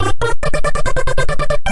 its a spacey noise